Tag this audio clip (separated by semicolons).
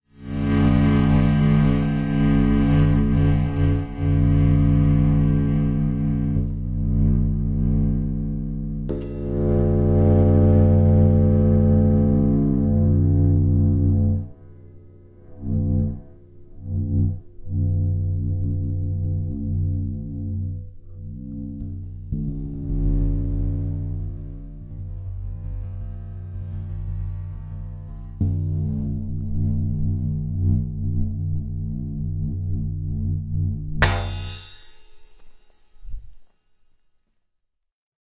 ride; rumbling; stereo; cymbal; drone; ambient; crash